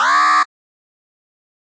1 alarm long a
1 long alarm blast. Model 1
alarm, gui, futuristic